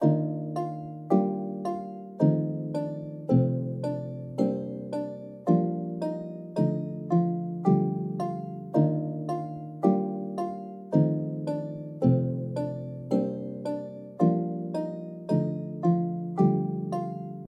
SFX for the game "In search of the fallen star". This is the song that plays in the forest section.
ambience, atmosphere, calm, midi, music